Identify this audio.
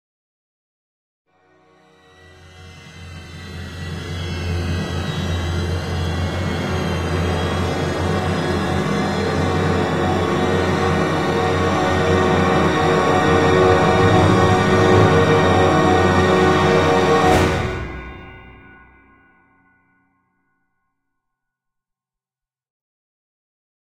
Robo Walk 05C
A giant robot taking a single step described using various instruments in a crescendo fashion.
Cluster, Orchestral, Suspense